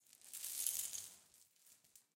chains 14rattle
Chain SFX recorded on AT4033a microphone.
chains, clattering, metal